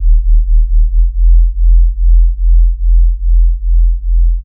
basscapes Ultralowend
a small collection of short basscapes, loopable bass-drones, sub oneshots, deep atmospheres.. suitable in audio/visual compositions in search of deepness
ambient, ambience, film, loop, soob, illbient, atmosphere, bass, soundscape, deep, boom, score, low, drone, backgroung, suspence, horror, pad, sub, creepy, electro, experiment, soundtrack, dark, spooky, rumble